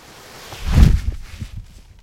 thud bassy slam
bassy, slam, thud